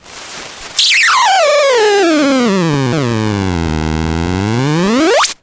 A czech guy named "staney the robot man" who lives in Prague build this little synth. It's completely handmade and consists of a bunch of analog circuitry that when powered creates strange oscillations in current. It's also built into a Seseame Street toy saxiphone. A long strange sound.
drone, synth, analog, circuit-bent, long, noise